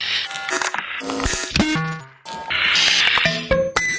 DistortionGrooves 120bpm02 LoopCache AbstractPercussion
Abstract Percussion Loop made from field recorded found sounds
Abstract, Percussion